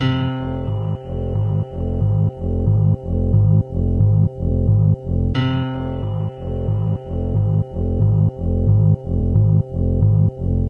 A kind of loop or something like, recorded from broken Medeli M30 synth, warped in Ableton.
broken, lo-fi, loop, motion